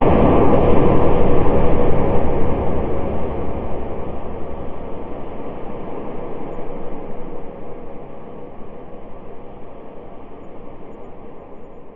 ab distance atmos
a distance boom effect with white noise